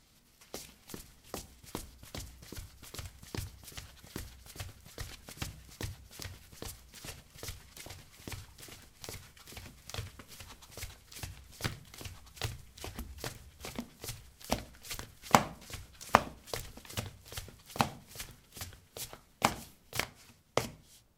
Running on ceramic tiles: sandals. Recorded with a ZOOM H2 in a bathroom of a house, normalized with Audacity.